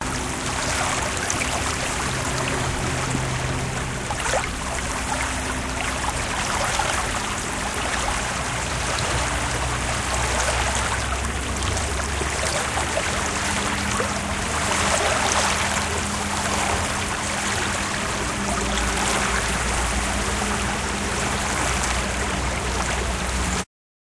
Waves gently breaking on lakeshore